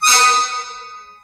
Stool-squeak-09
The stools in the operating theatre, in the hospital in which I used to work, were very squeaky! They were recorded in the operating theatre at night.
squeak, friction, percussion, stool, metal, hospital